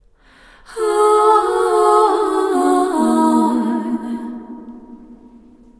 short female vocal5
Testing out my new mic with a tiny vocal harmony.
Recorded in Ardour with the UA4FX interface and the the t.bone sct 2000 mic.
ethereal, reverb